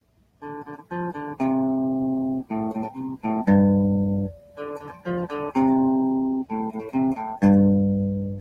Goofy Western guitar music made with my Gibson Les Paul. I made this so it can be looped and played repeatedly.
western-music, cowboy-music, rythym, guitar, cowboy-guitar, italian-western